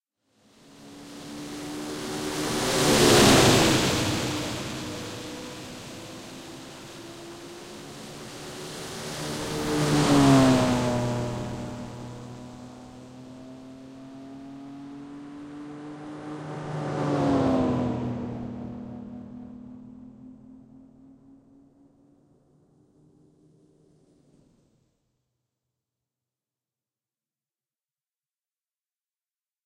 Car rounding short circuit
Three examples of what sounds kind of like a rally car passing from right to left; the 'road' appears to get drier with each pass. Small turning circle, which is centred approximately twenty metres directly out in front. This sound was generated by heavily processing various Pandora PX-5 effects when played through an Epiphone Les Paul Custom and recorded directly into an Audigy 2ZS.
circling-car, passing